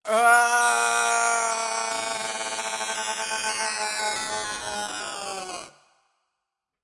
Matrix scream
Tried to recreate the mirror scream from the matrix.
sound-design, scream, sound, Matrix, design, sounddesign, movie